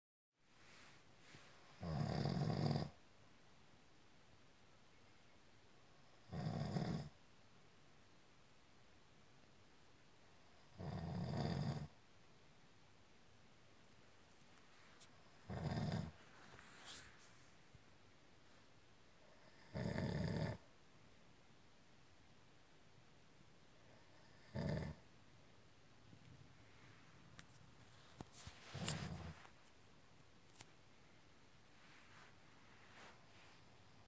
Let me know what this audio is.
Snoring sounds 5am